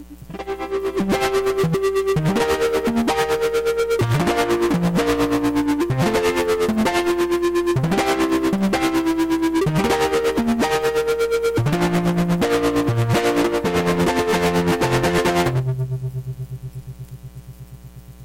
piano+synth2
July 19, 2019. Audacity + Arturia Minibrute + Yamaha Clavinova. With a weird filter and a cool vibe. Enjoy.
analog, clavinova, funny, yamaha, piano, arturia, synthesizer, minibrute, loop, fun, digital, electronic